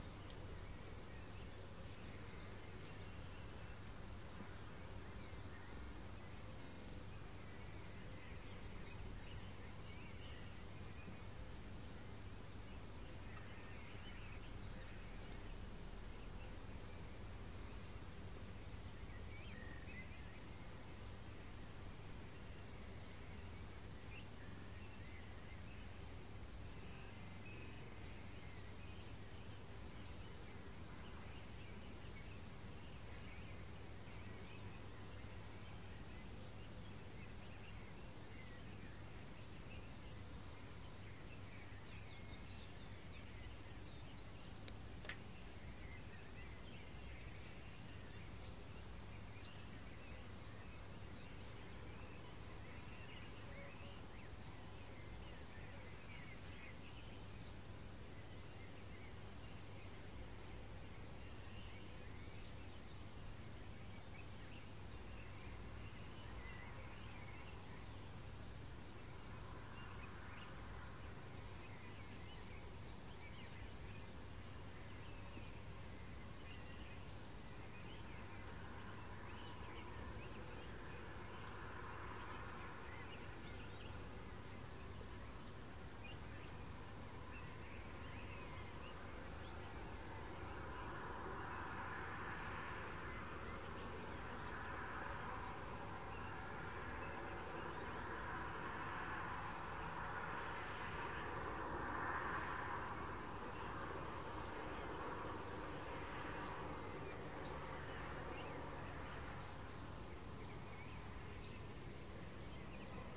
Israel summer early morning
This is something that you could hear through the open window at night in the north of Israel in the Shlomi. Cicadas, birds, cars, driving along the highway. Birds begin to sing around five in the morning, when it begins to dawn. A cicada scream all night.
Recorded: 13-06-2013.
Format: Mono.
Device: Galaxy Nexus
Posted with permission
Izrael, Shlomi, birds, cicadas, night, noise